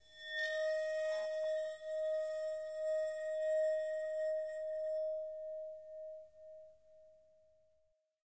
A sound of a bowed cymbal.